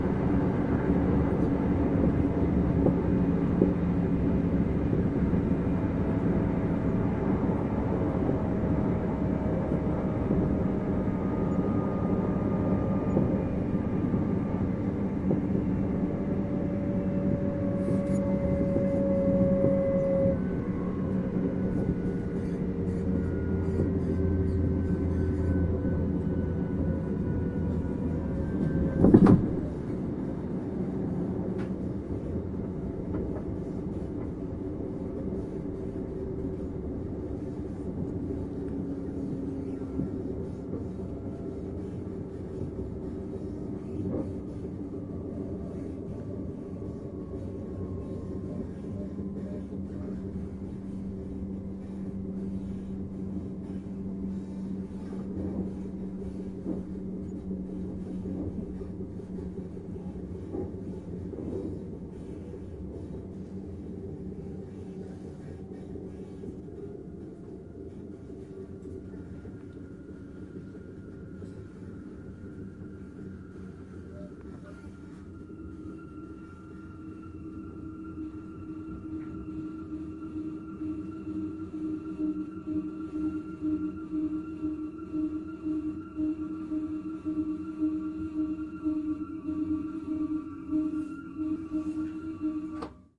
Train slow braking 01
The train type is Škoda InterPanter. This track is recorded between station Podivín and Břeclav.
braking, train, wheels, train-brake, train-braking, creak, locomotive, rail, rail-way, rail-road, railway, electric-train